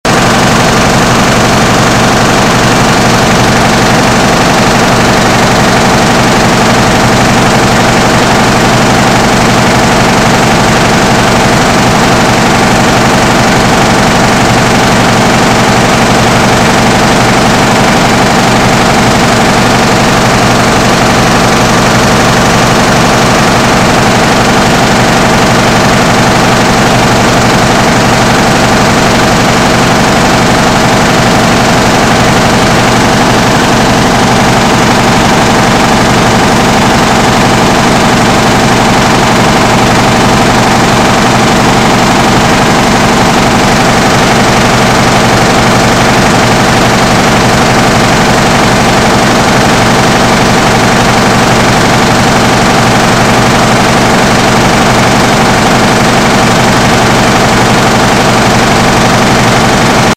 printing machine delivery
this sweet sound is recorded during my nightshift from sa.2.sept.2006 to the 3.sept. producing the Sonntagszeitung(Newspaper) at Tamedia Printing compound. I recorded at 22:15 during Vordruck prosses. The baby did run at 80'000 ex.per.h. holding the mic from my h 340 iriver in front of the delivery system.Zürich Switzerland
field-recording, maschine, noise, unprocessed